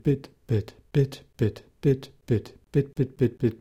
8bit8beat
Saying "bit" in a beat, arranged in Audacity, recorded with a Zoom H2.
beatbox, language, male, speaking, voice